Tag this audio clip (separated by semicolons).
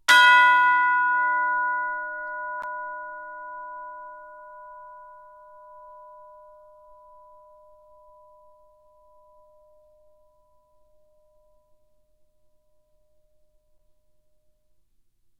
bells; chimes; decca-tree; music; orchestra; sample